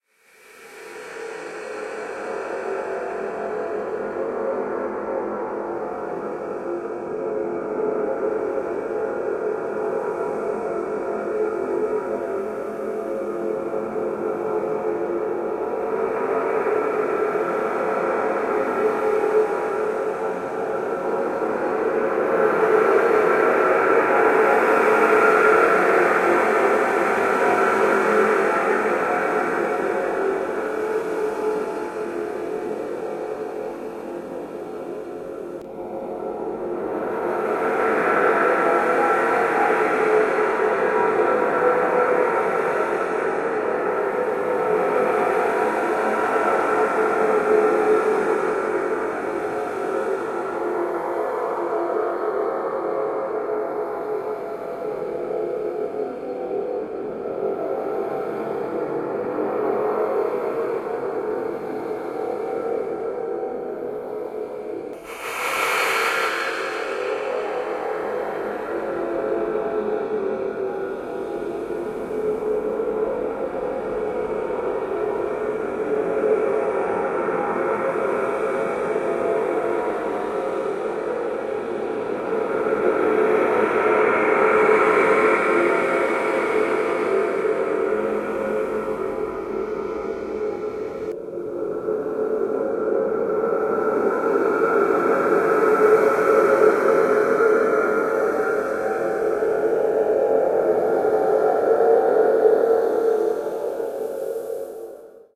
Drone 2 [thunder tube]
This deep, roaring drone clip was modified from a thunder-tube instrument.
deep, thunder